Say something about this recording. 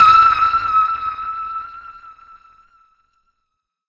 SONAR PING PONG E
The ping-pong ball sample was then manipulated and stretched in Melodyne giving a sound not dissimilar to a submarine's SONAR or ASDIC "ping". Final editing and interpolation of some notes was carried out in Cool Edit Pro.
250, asdic, atm, audio, ball, game, manipulated, media, melodic, melodyne, microphone, millennia, note, notes, percussive, ping, pong, preamp, processed, sample, scale, sonar, sport, table, technica, tennis, tuned